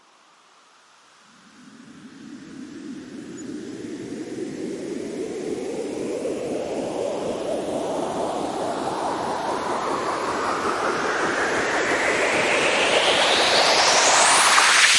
This is an Fx Transition. Processed in Lmms by applying effects.